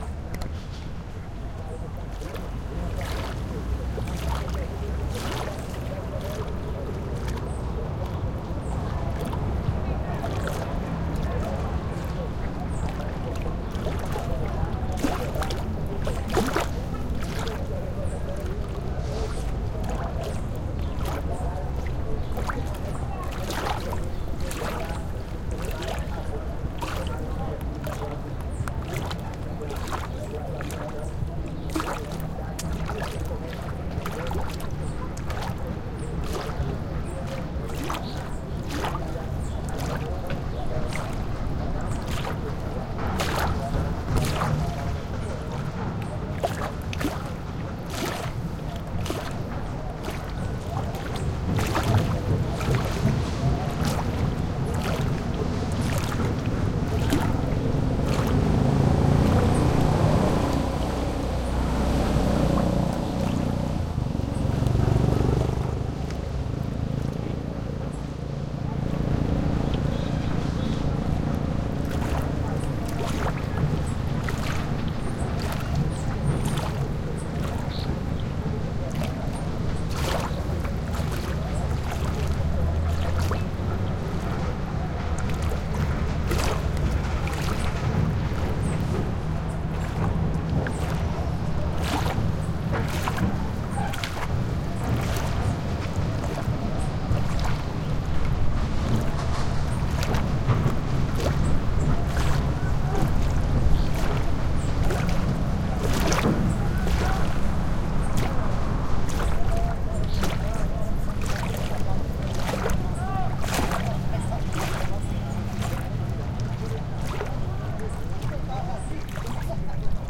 160609 08 Mirante com Banco
Nome do Arquivo: 160609_08
Longitude: - 38.96769986
Latitude: - 12.60279836
Elevação: 4 m
Local: Cás em frente ao Tiro de Guerra
Bairro: Centro
Data: 10\06\16
Hora: 10:16
Descrição: Cás Rio Enchendo
Gravador: Sony D50
Tags (palavras-chave): Cachoeira Cás Rio enchendo
Duração: 02:00
Autor: Gilmário e Wesley
rio, brasil, Cachoeira, field-recording, brazil, water, river